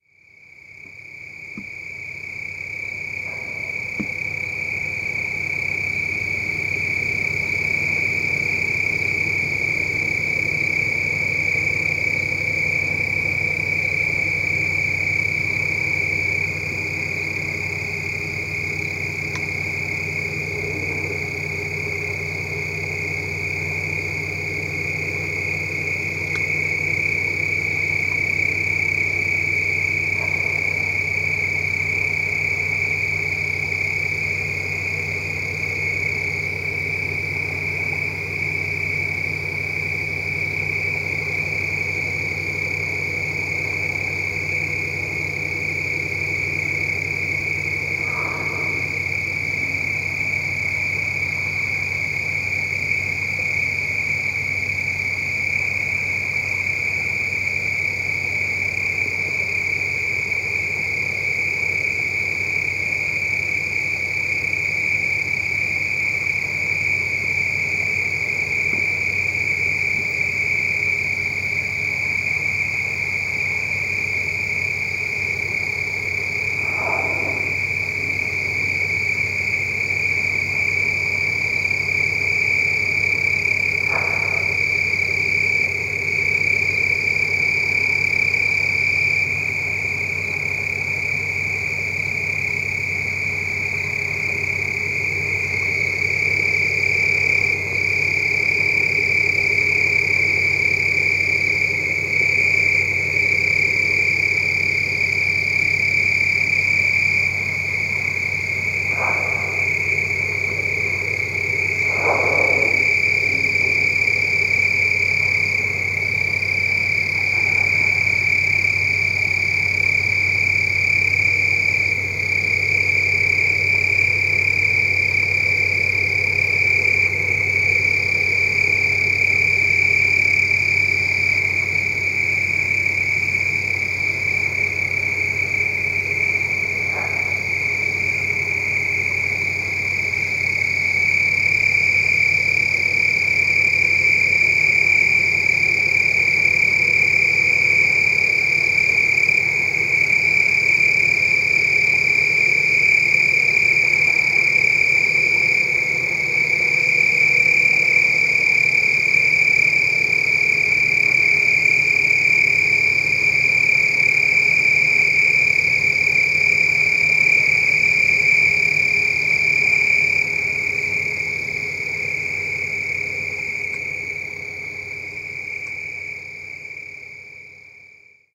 CFv1 track21
The crickets you can not miss-- but if you listen carefully, you will hear Fin whales blowing in the background.
crickets, whales, baja-california-sur, bahia-san-nicolas